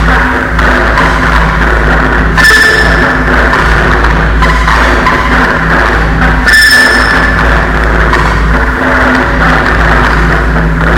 You are bidding on! No, these are my PSS 270 synth Cutter,
I finally busted that bitch out of storage again, it is processed through a Korg KAOSS Pad with the Reverb
Effect. So it is semi Noisy, Enjoy.! Good for some back drops, If you
mess with the glitches you can hit a key to sync the key to a drumloop,
and the drumloop becomes the keyloop, ryhthmic Isnt it.....I love everyone. ENJOY!